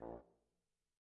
One-shot from Versilian Studios Chamber Orchestra 2: Community Edition sampling project.
Instrument family: Brass
Instrument: Tenor Trombone
Articulation: staccato
Note: A#1
Midi note: 34
Midi velocity (center): 15
Room type: Large Auditorium
Microphone: 2x Rode NT1-A spaced pair, mixed close mics